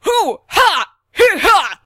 HOO! HA! HEE HA!
WARNING: might be loud
getting pumped up for a difficult task
voice, pump